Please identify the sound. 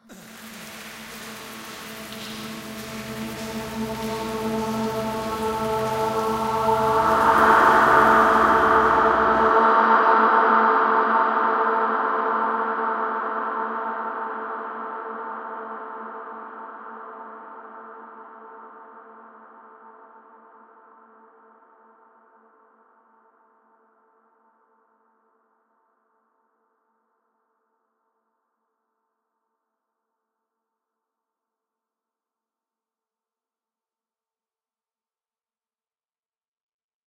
crg horrorvoice
ambient, creepy, crg, echo, ghost, rain, singing, voice, woman